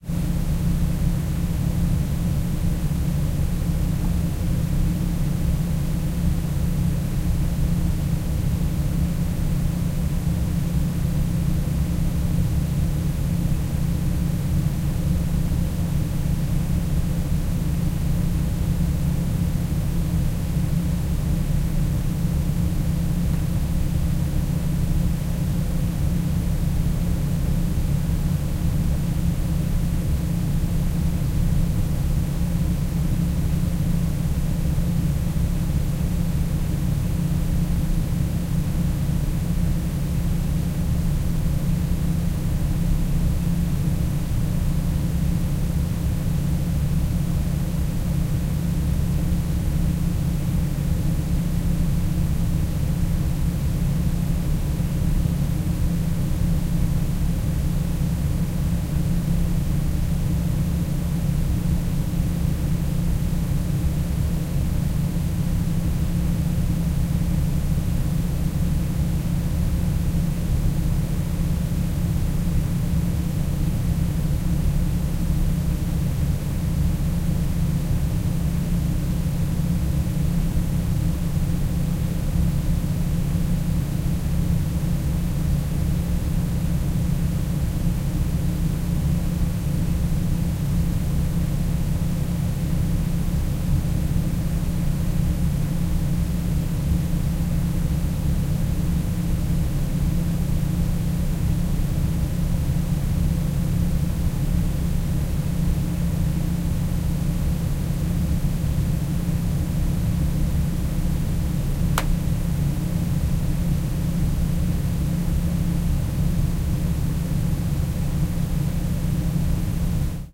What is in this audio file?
Front recording of surround room tone recording.